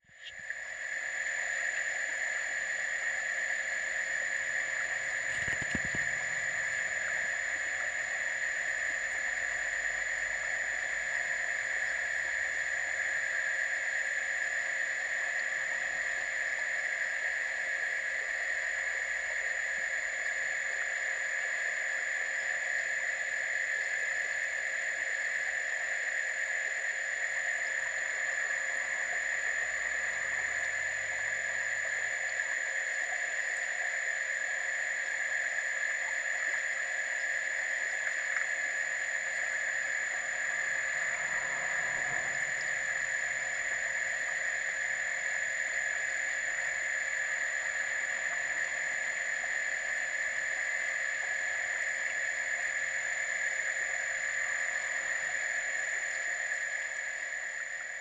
Hydrophone Newport Footbridge Fountains 07
bubbles, eerie, hydrophone, newport, southwales, strange, submerged, underwater, water